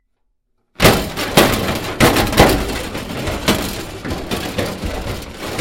metal roll cage hits heavy 1
Foley SFX produced by my me and the other members of my foley class for the jungle car chase segment of the fourth Indiana Jones film.
metal, hits, rollcage, heavy